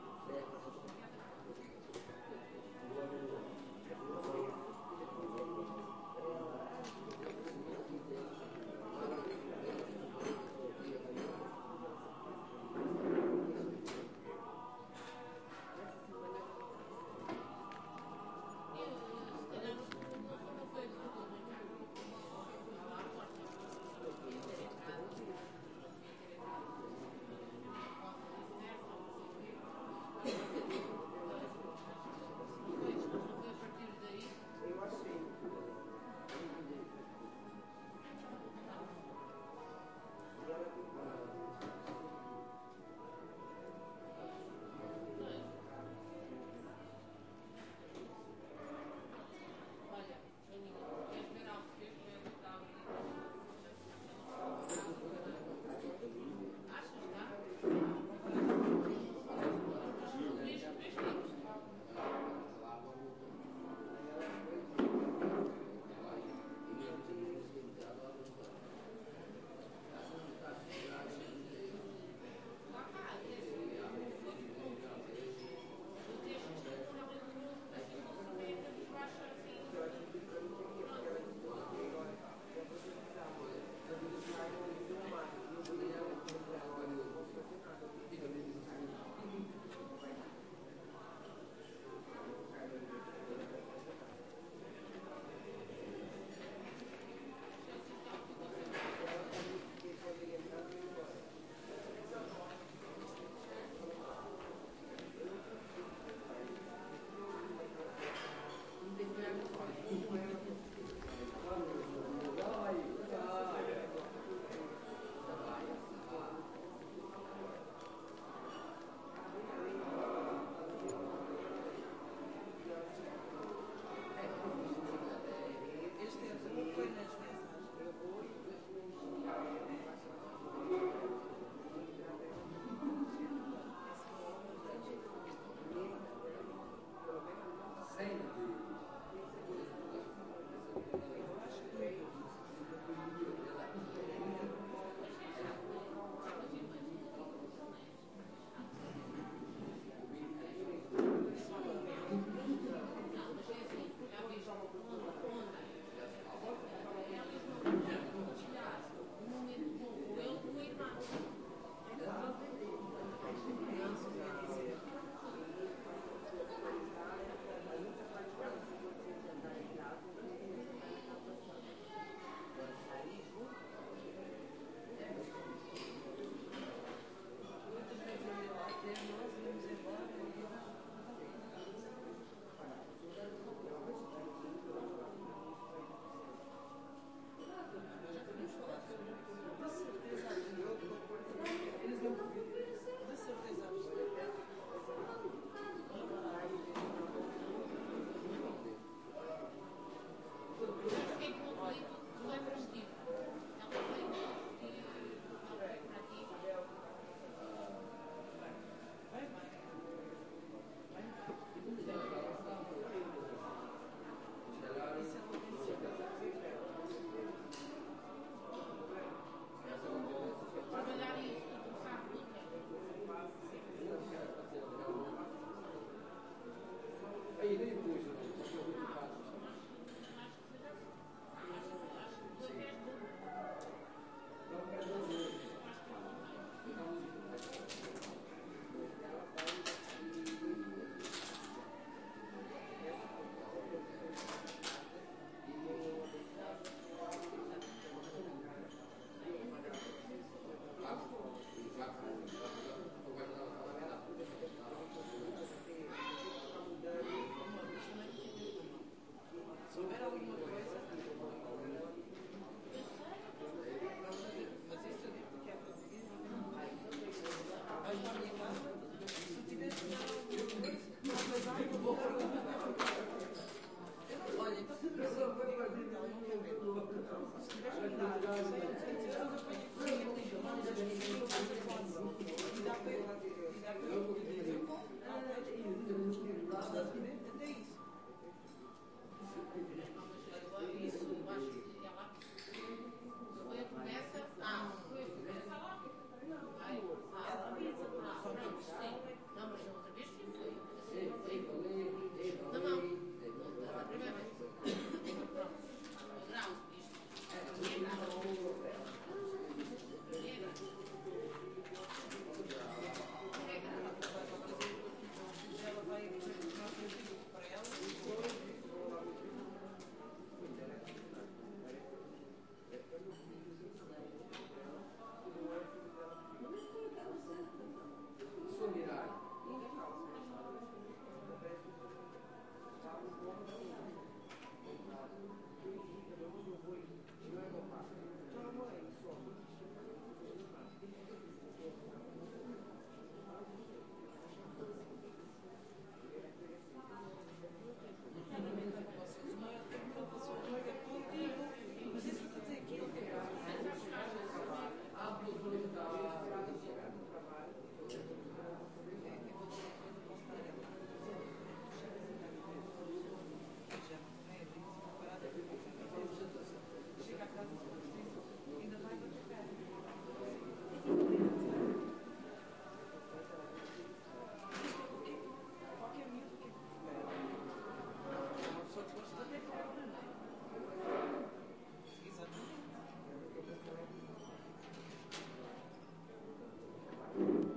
130810- redondo ruas floridas 13 03 CCR
... during the summer festival in Redondo, Portugal, a coofee break at cultural center of the village...